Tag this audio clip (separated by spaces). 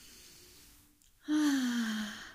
breath human nostalgic